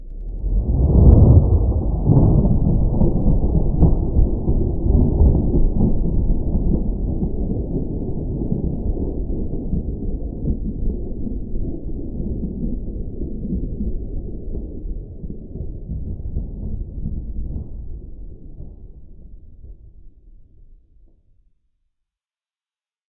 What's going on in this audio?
This Is a thunderstorm sound effect it's for a thunder and rain for a game or project
Thunder, Rain, Weather, Storm, Thunderstorm, Lightning